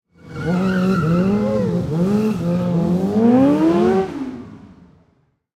Motorcycle Tire Burn Drive Away
Recorded at an auto show in Dallas, TX. This is one of the few usable clips from the motorcycle stunt/trick show they had. So many yelling children haha. Apologies if the crowd noise is too apparent, I did the best I could at the time!
Recorded on a ZOOM H2 set to stereo.